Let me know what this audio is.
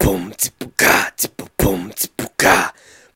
Beatbox Boom Tsi Ke Ka
A vocal beat-box rhytmn. Recorded with Edirol R-1 & Sennheiser ME66.
beat, vocal, beatboxing, drums, beatbox, rhythm, beat-box, drumming